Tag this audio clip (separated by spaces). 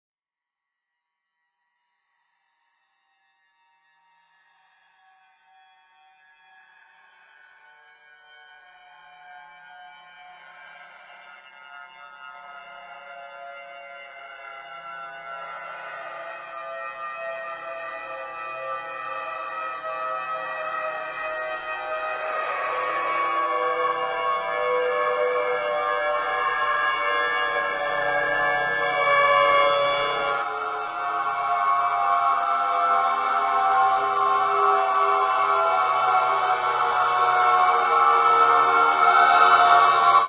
Reverse Median Crawler Piano